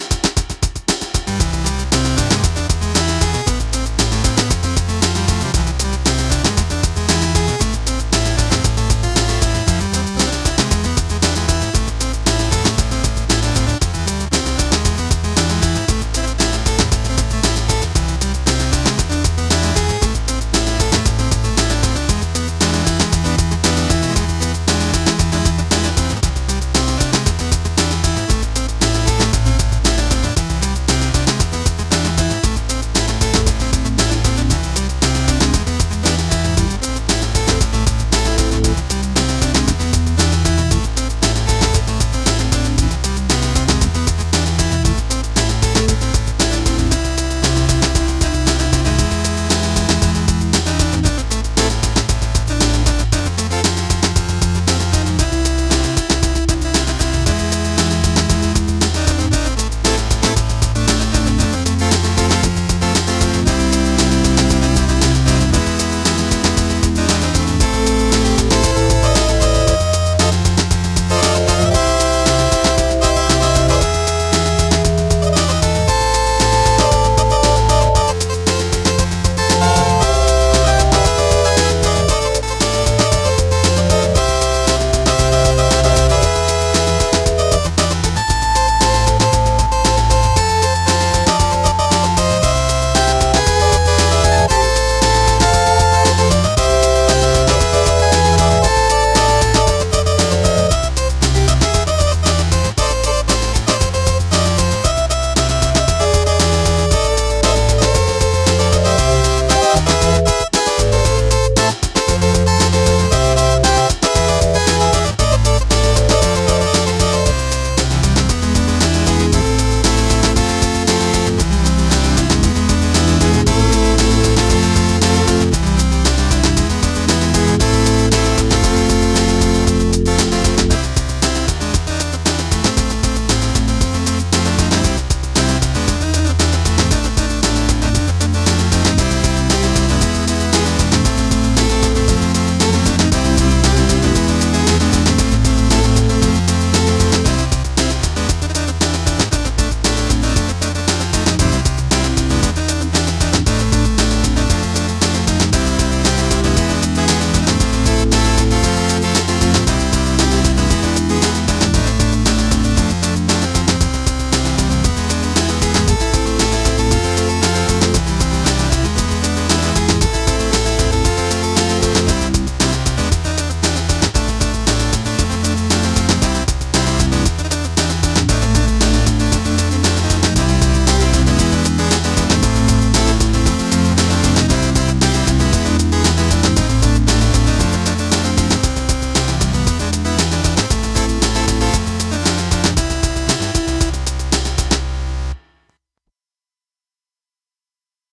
11172013 dblSpd organDrums
I have no real memory of making this recording from 2013 but I recognized the theme from other sound files I've uploaded and so I decided this recording would fit in well here.
Recording using the DM-1 iOS app and the Alesis QS8, played back at double speed.